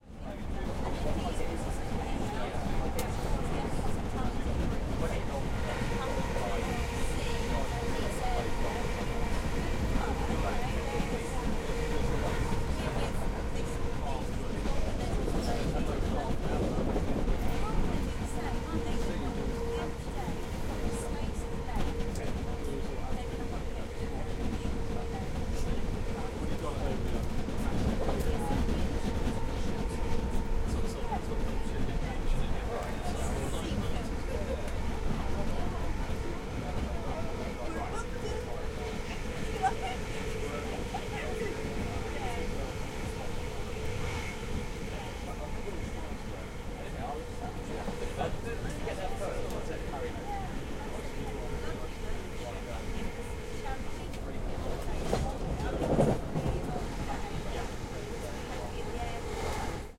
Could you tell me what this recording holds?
Train int moving passenger talking
Recording of a UK train journey with lots of passenger talking whilst the train is moving.
Equipment used: Zoom H4 internal mics
Location: About Letchworth Garden City
Date: 18 June 2015
talking, int, UK, passenger, Train